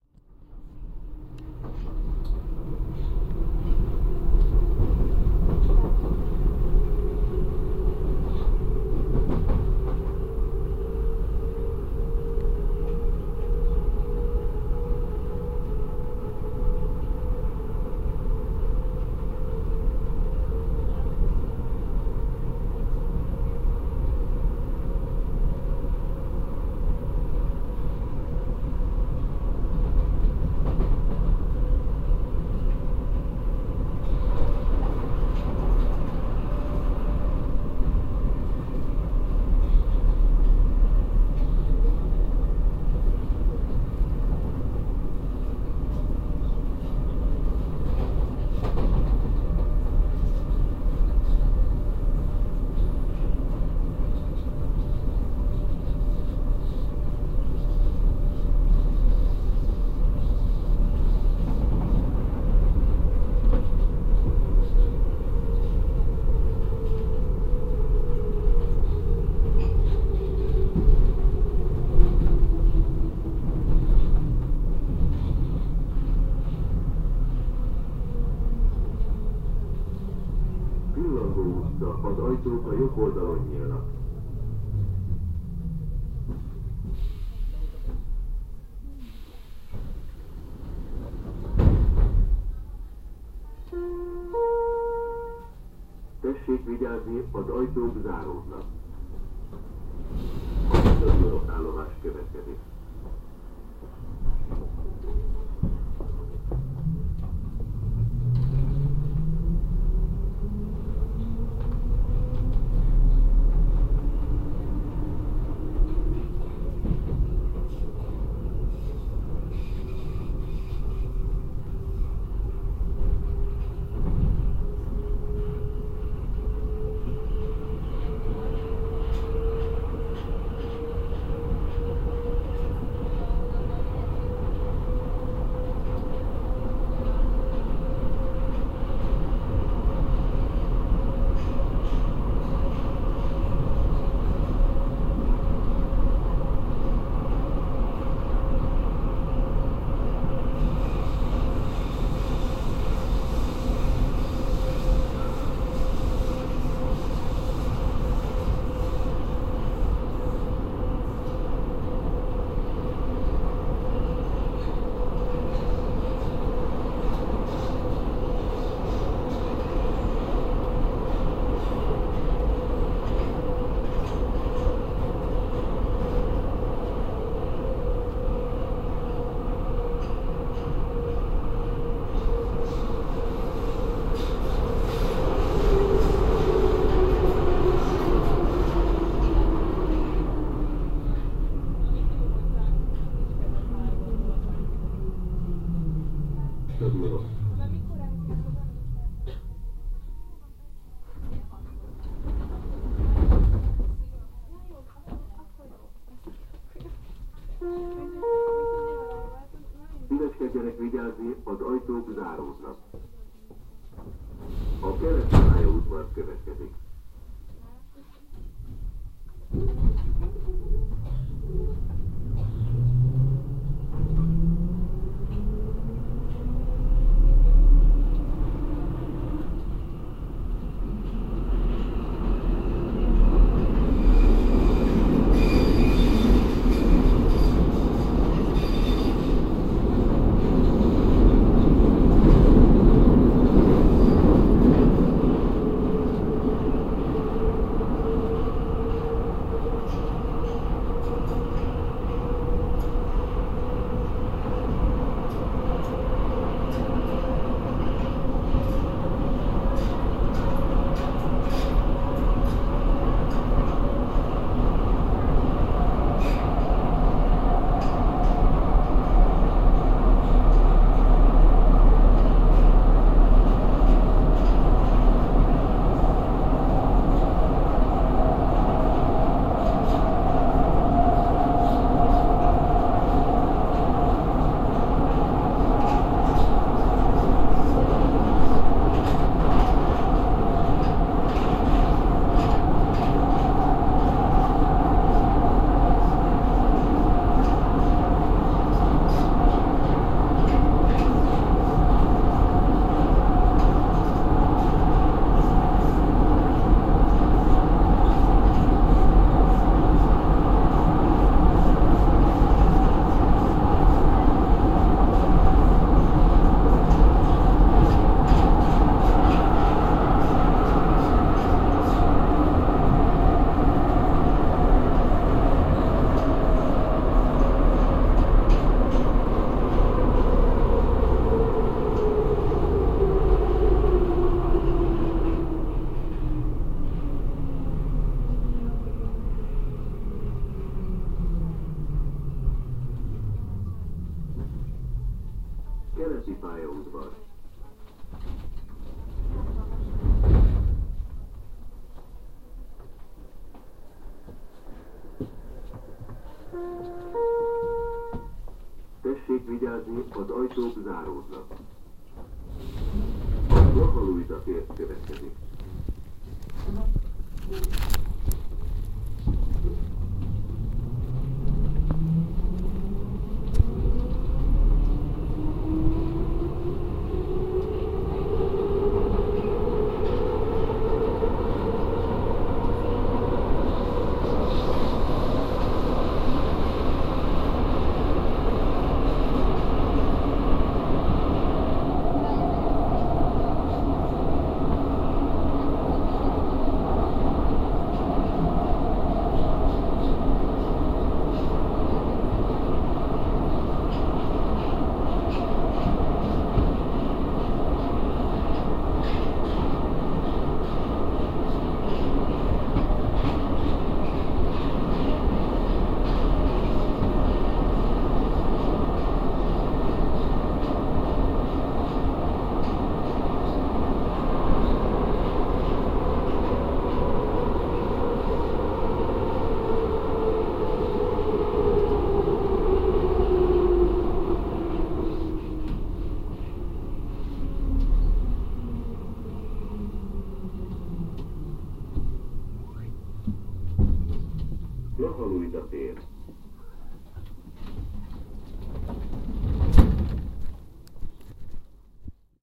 Budapest Metro line 2. from Örs vezér tere to Blaha Lujza tér (five stations). Ambiance sounds were recorded by MP3 player during the trip.
subway, underground, metro, transport, motor, vehicle, field-recording, horror